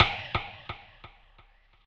delayed band drum
band, drum, electronic, percussion, synthetic